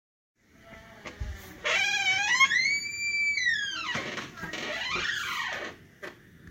Door open Dave (1)
A door that needs its hinges oiled
creak, creaky, open, squeak, squeaky